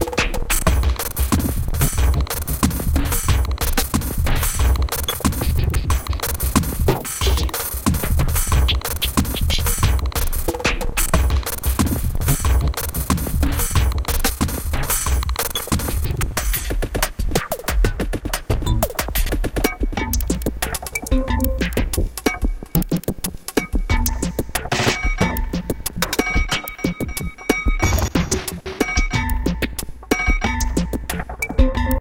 This loop has been created using the program Live included Ableton 5and krypt electronic sequencer drums plug in in the packet of reaktorelectronic instrument 2 xt